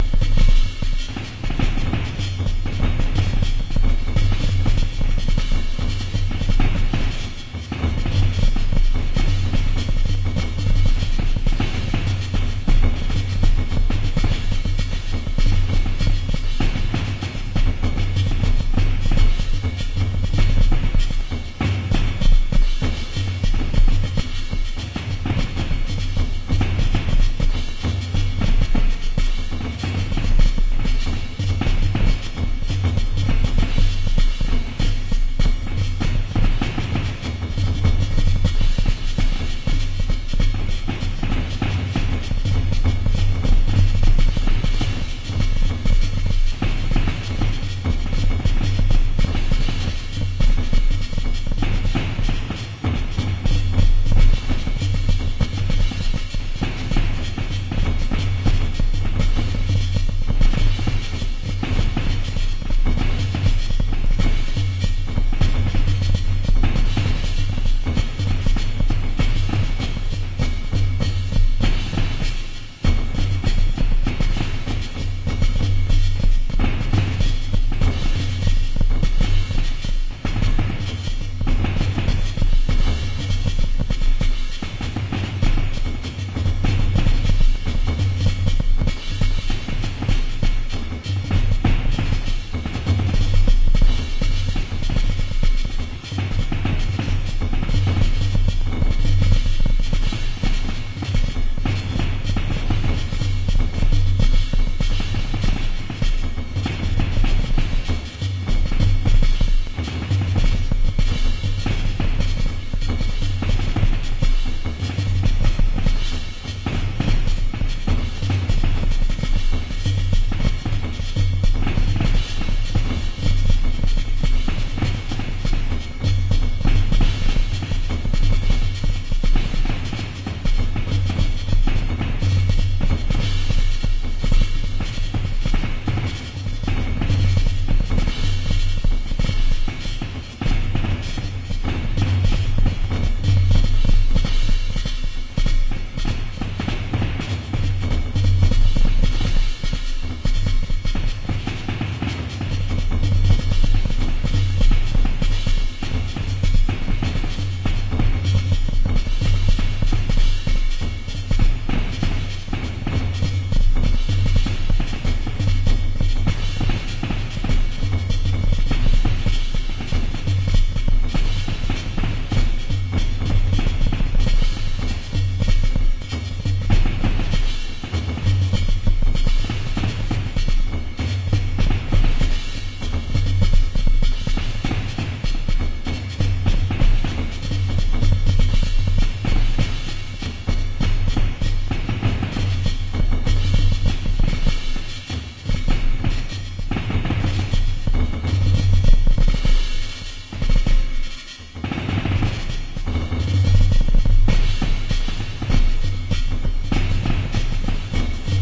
Beatslicing ran on one of my 100 bpm loops.